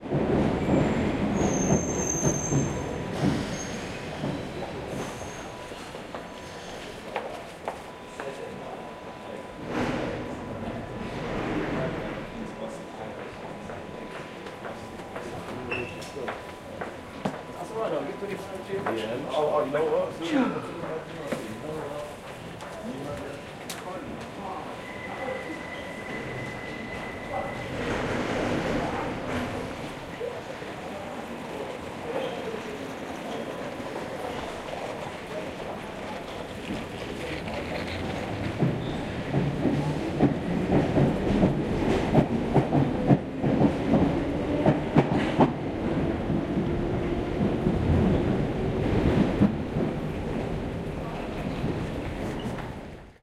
808 Kings Cross Underground 10f
General ambience of an underground station with the sound of a "tube", footsteps, and passengers. Recorded in the London Underground at Kings Cross tube station.
london-underground, tube, field-recording, london, underground, platform